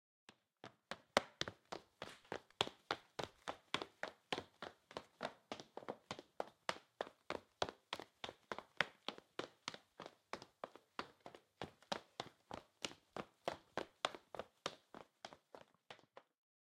08-Man fast walking wooden floor
Man fast walking on wooden floor